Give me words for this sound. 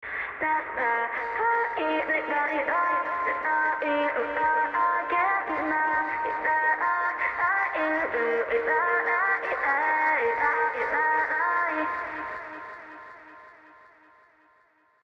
recorded myself singing and edited in fl studio
soft female vocal chops
160bpm, Female, fl-studio, Recording, Soft, source, Vocal, Vocoder, Voice